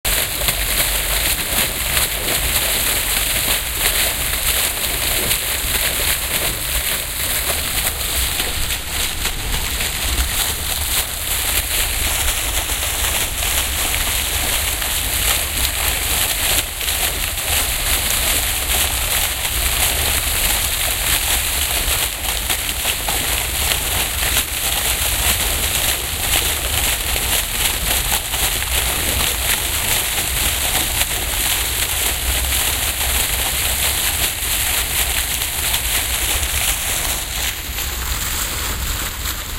Lancaster Gate - Aggressive water fountain
ambiance, ambience, ambient, atmosphere, background-sound, city, field-recording, general-noise, london, soundscape